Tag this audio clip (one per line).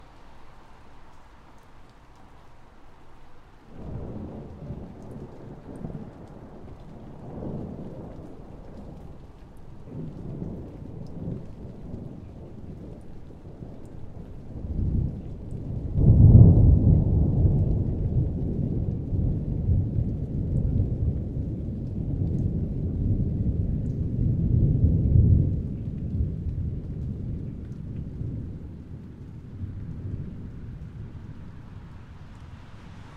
Lightening
Rain
Storm
Thunder